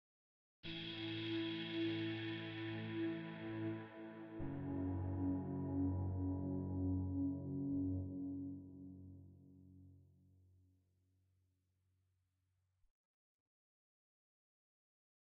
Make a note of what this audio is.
SynthPad Modulation
Bi-Tonal Modulating Soft AirPad, "never used" , free to exploit. Would appreciate link to good finished songs using it.
Electronic, Pro, Nice, Airy, Modulation, Pad, Bi-Tonal, Air, Soft, Harmonious, Synth, Simple, Good, Digital, Drum-And-Bass, Sweet, Synthetizer